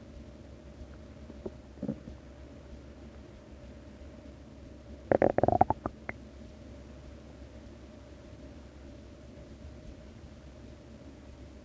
Some bubbling.
Recorded with a Google Pixel XL, as its bottom-facing (mono) microphone is perfect for this. No noise reduction.
bubble, guts, intestines, human, stomach, digestion, groan, liquid, body, gurgle